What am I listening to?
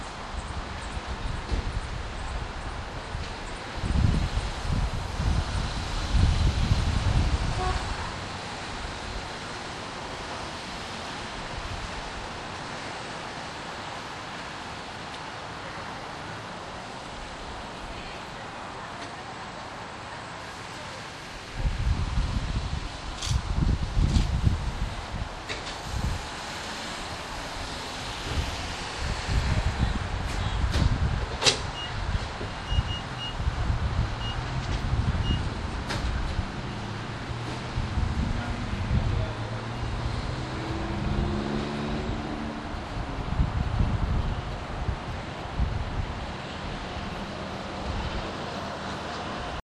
georgia kingsland gas
Getting gas just inside Georgia recorded with DS-40 and edited in Wavosaur.